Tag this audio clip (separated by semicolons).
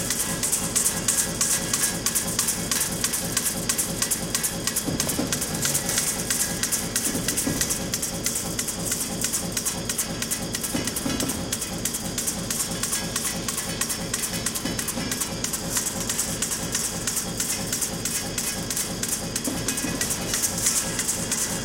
machinery; industrial; robotic; motor; presse; machine; noise; manufactur; whir; fabrik; press; maschienenmusik; maschine; pump; mechanical; factory; hydraulic; robot